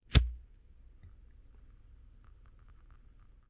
Clipper Lighter c3000
Clipper, Flame, Lighter